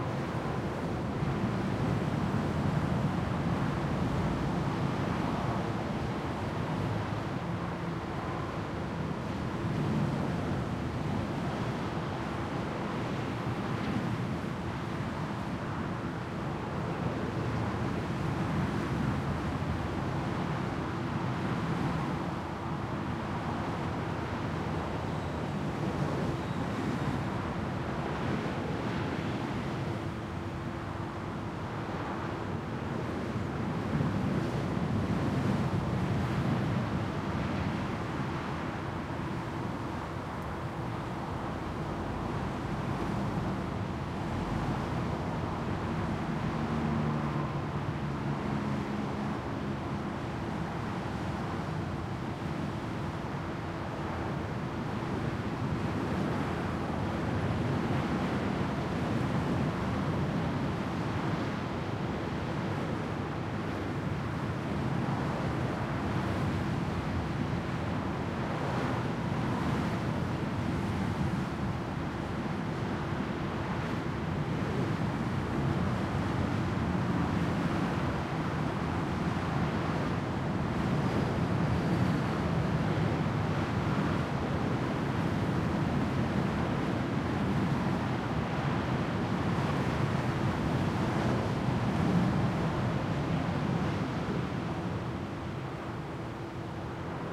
4ch field recording of a small rest area next to a German motorway, the A5 by Darmstadt. It is high summer afternoon, the motorway is fairly but not excessively busy.
The recorder is located on the parking strip, facing the motorway.
Recorded with a Zoom H2 with a Rycote windscreen.
These are the FRONT channels, mics set to 90° dispersion.
loud, Autobahn, surround, freeway, cars, atmosphere, field-recording, trucks, noisy, car, background, atmo, traffic, road, busy, backdrop, driving, highway, motorway, summer